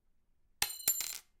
Little Metal Piece Drop 2
Another take of a small piece of metal dropping
piece, metal, small, dropping